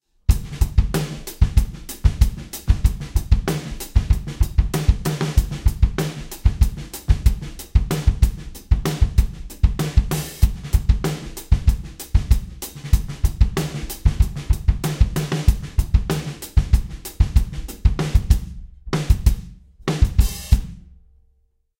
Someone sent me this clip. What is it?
mLoops #6 95 BPM
A bunch of drum loops mixed with compression and EQ. Good for Hip-Hop.
150, Acoustic, BPM, Beats, Compressed, Drum, EQ, Electronic, Hip, Hop, Loop, Snickerdoodle, mLoops